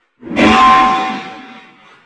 A processed BB hitting a whip cream can. Part of my new '101 Sound FX Collection'